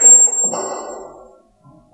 The stools in the operating theatre, in the hospital in which I used to work, were very squeaky! They were recorded in the operating theatre at night.
percussion, friction, hospital, metal, squeak